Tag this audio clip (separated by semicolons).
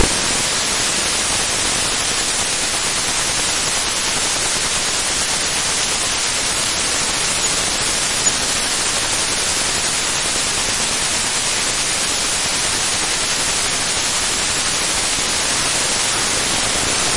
noise; audacity; sample